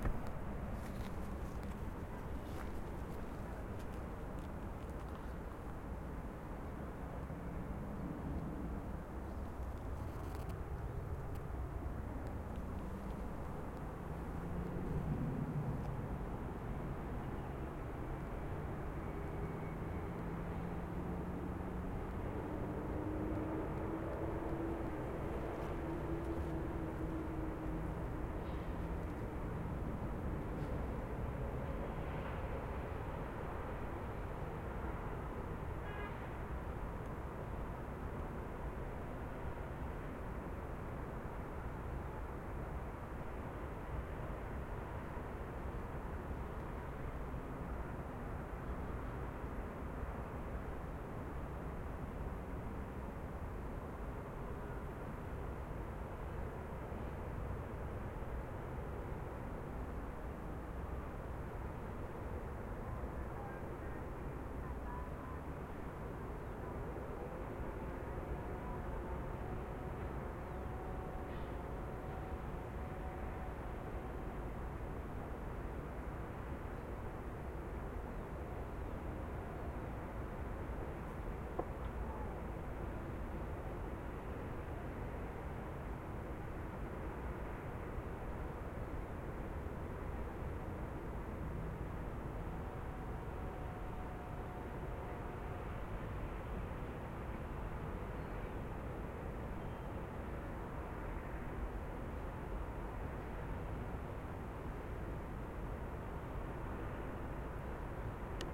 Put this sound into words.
Quebrada La Vieja - Murmullo desde terreno escarpado
Grabación en la Quebrada La Vieja Bogotá - Colombia
Murmullo de la ciudad desde los cerros en terreno escarpado a las 09:17 a.m.
Field recording from river La Vieja Bogotá - Colombia
Ripple of the city from the hills in steep area at 09:17 a.m